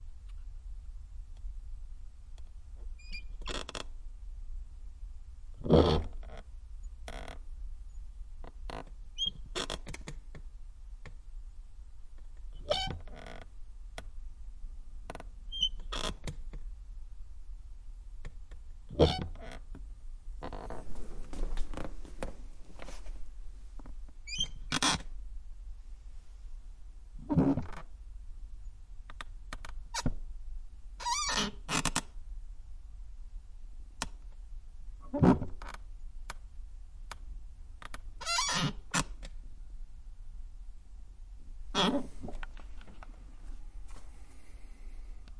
close mic'ing of a squeaky office chair